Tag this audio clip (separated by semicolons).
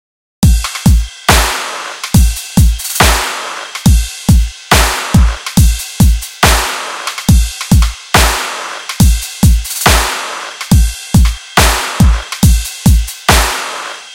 140; beat; bpm; drum; Dubstep; free; good; loop; mastering; mix; quality